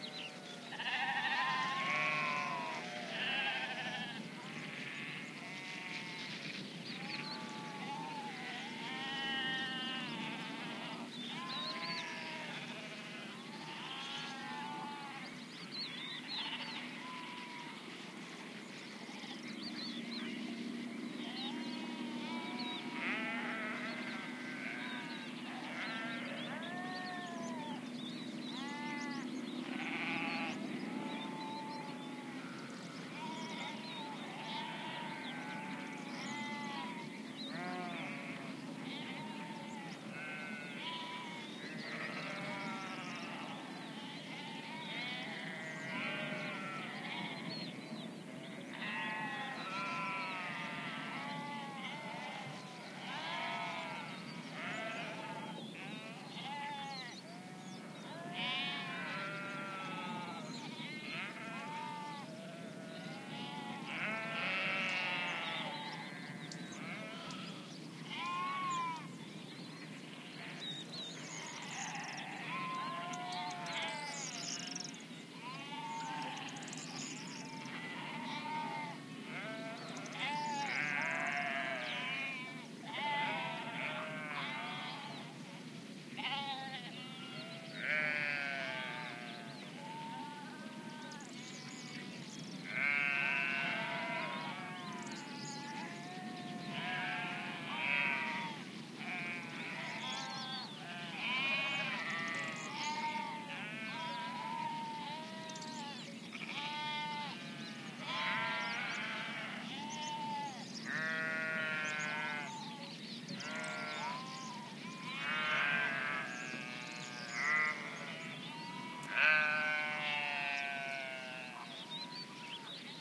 20080302.sheep.far
distant sheep bleating
ambiance, birds, bleating, field-recording, marshes, nature, sheep, south-spain, spring